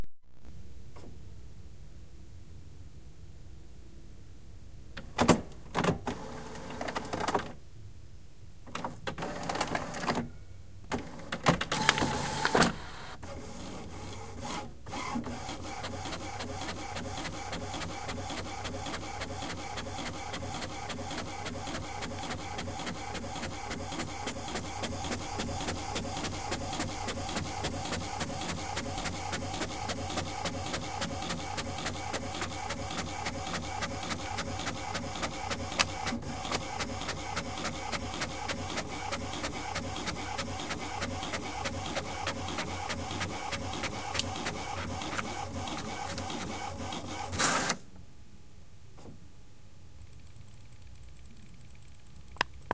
Printing one page.